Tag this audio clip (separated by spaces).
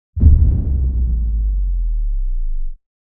sub Bass Explosion drop 808